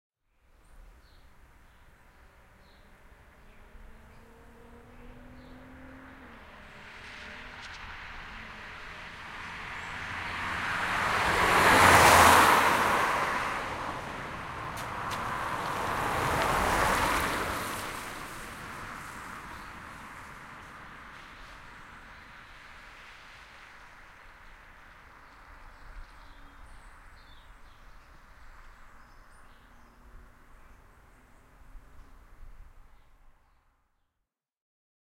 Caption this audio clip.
Car Passing, Multi, A
Raw audio of two cars passing close to the recorder; one from left to right, and the other from right to left. The car passed approximately 2 meters from the recorder.
An example of how you might credit is by putting this in the description/credits:
The sound was recorded using a "H6 (MS) Zoom recorder" on 25th January 2018.